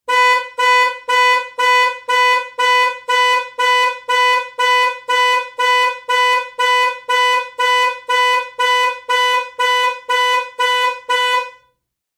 Car alarm recorded at night in a neighborhood called Church Hill located in Richmond, Va using an HTC Amaze cell phone.
Recorded by Brian Parker
car, alarm